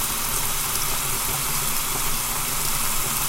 water flowing 01 loop
Flowing water, looping. Recorded with Audio-Technica AT2020.
flow, loop, water